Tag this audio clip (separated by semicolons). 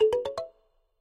tone; Beep; sounds; ring; App; development; Click; Button